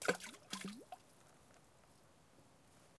Tossing rocks into a high mountain lake.